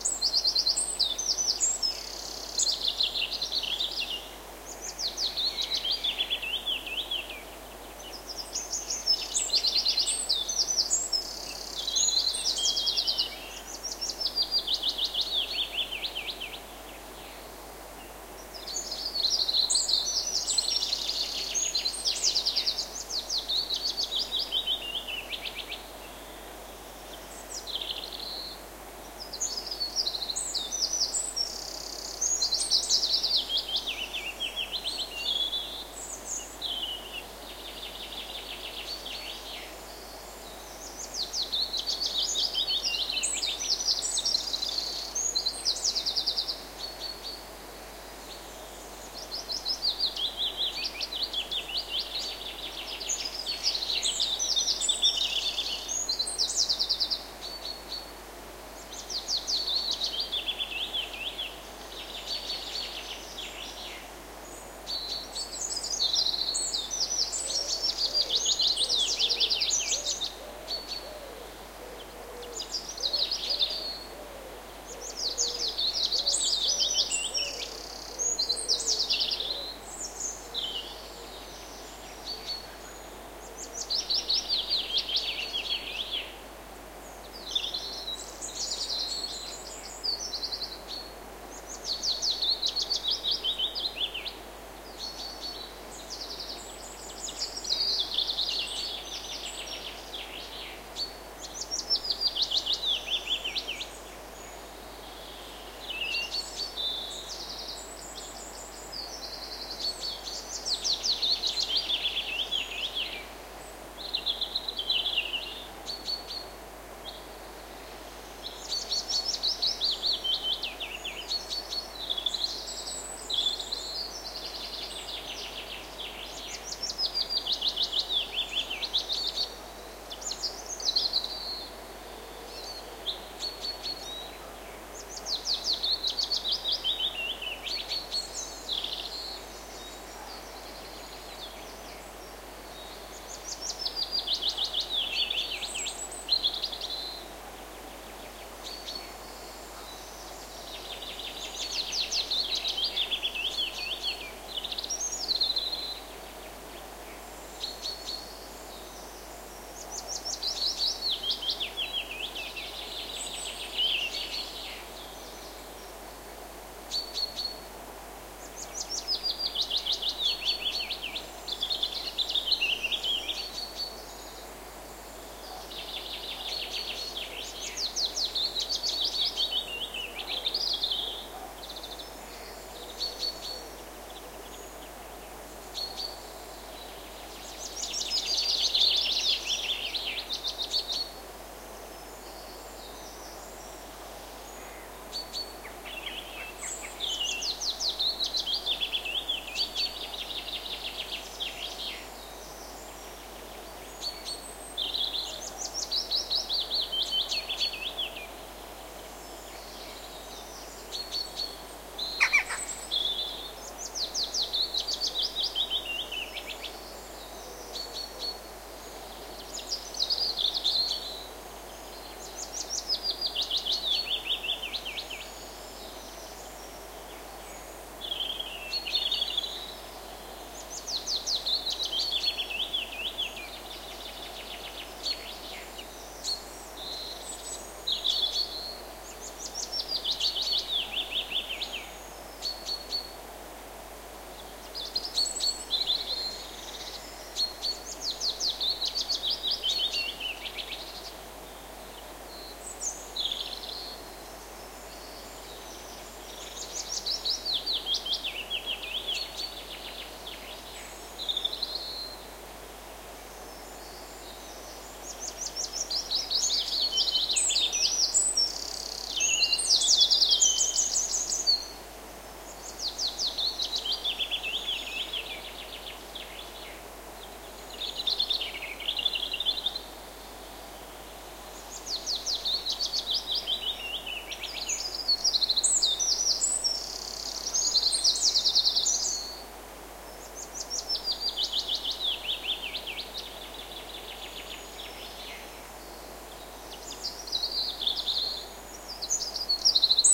scottish morning 11
This recording was done on the 31st of May 1999 on Drummond Hill, Perthshire, Scotland, starting at 4 am, using the Sennheiser MKE 66 plus a Sony TCD-D7 DAT recorder with the SBM-1 device.
It was a sunny morning.
This is track 11.
If you download all of these tracks in the right order, you are able to burn a very relaxing CD.
birdsong, birds, scotland, dawnchorus, morning, nature, countryside, field-recording